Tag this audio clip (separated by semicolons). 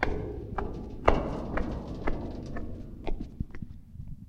metallic; floor; foot; steps; iron; footsteps; stepping; metal; walking; ground